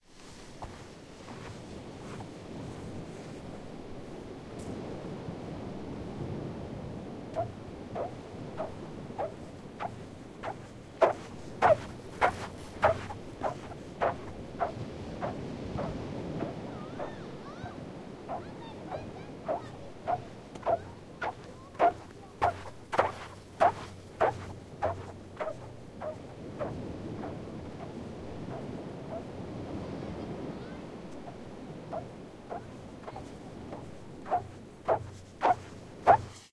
Porth Oer sand squeaking underfoot
A field recording of sand squeaking underfoot at Porth Oer (Whistling Sands) beach on the Llyn peninsula North Wales. Zoom H2 front on-board mics.
sand, xy, field-recording, squeaking, walking, singing-sand, stereo, beach